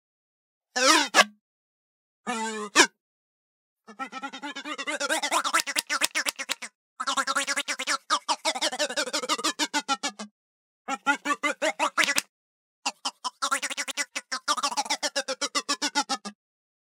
I recorded my favorite odd groan toy. These are the quick random slides, some parts have the slap of the whistle hitting the end of the tube. Recorded with my Sennheiser 416 on a Tascam DR-680.